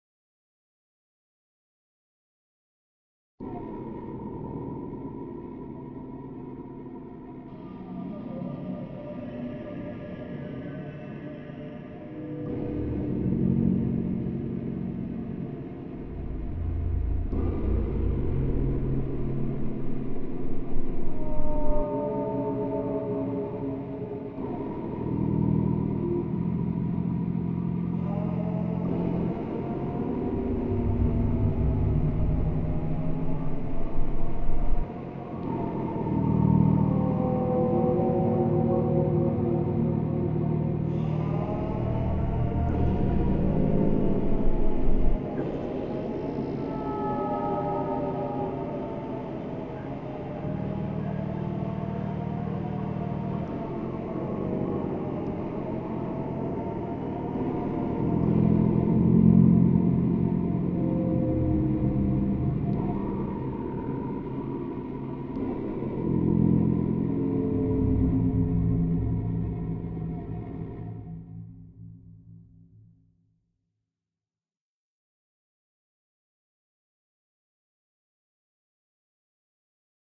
ambience music suitable for horror scenes
horror, omen